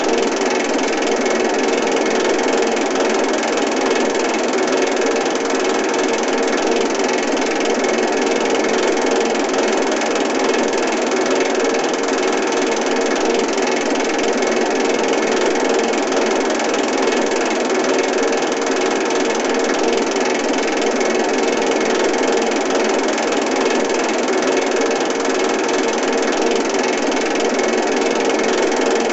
machine rotation2
rolling, machinery, SF, machine